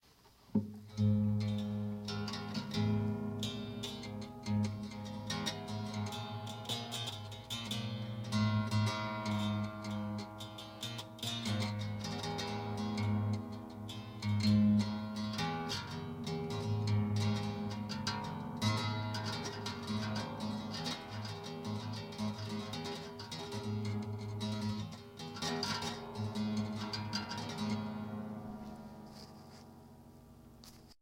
Guitar Strings (2)
acoustics, Guitar, Strings